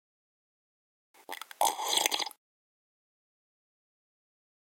13 - Sip liquid
When you sip liquid or a soup.
soup, Pansk, Czech, CZ, water, Panska, liquid, sip